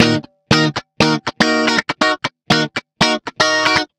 Some clean, minor, rhythmic riff on stratocaster guitar. Recorded using Line6 Pod XT Live.